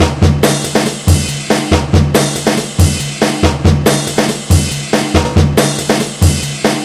drum-loop reconstruct with vst slicex (fl studio) and cut sample final with soundforge 7